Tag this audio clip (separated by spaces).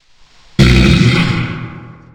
beast scary zombie monster growl horror creature spooky